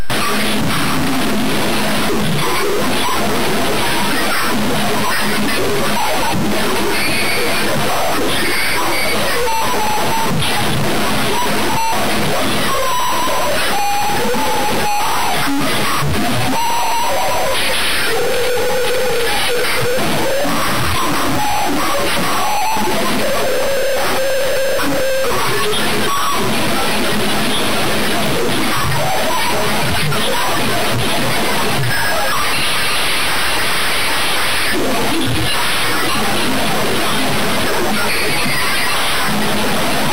Static noise
a recording from me edited to a voice changer online
glitch, radio, sound-design, electric, static, electronic